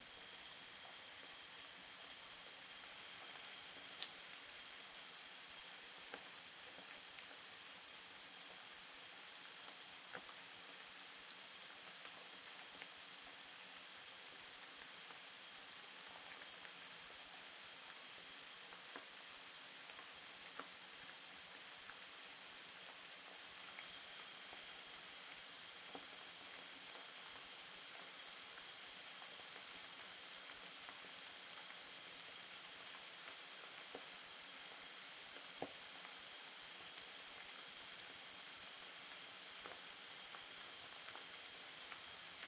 Cooking with oil
Thank you for the effort.